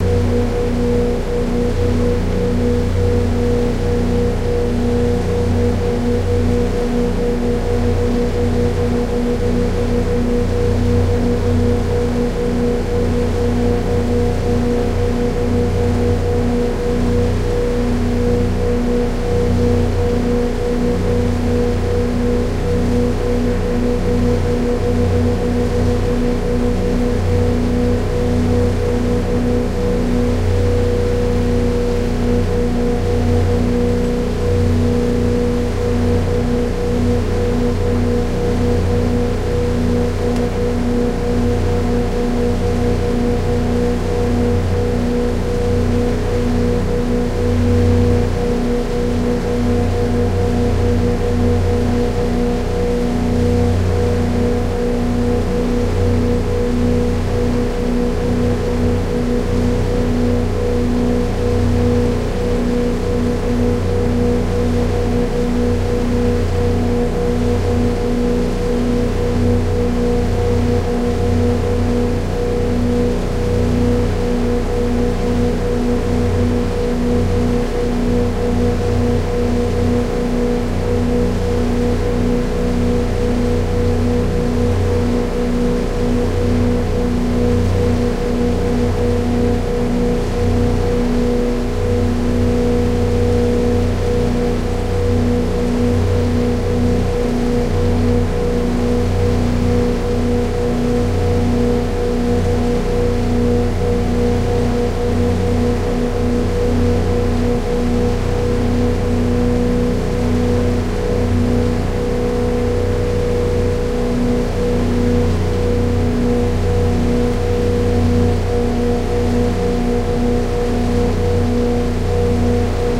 speed boat outboard int cabin high gear facing closed bow
boat, cabin, high, int, outboard, speed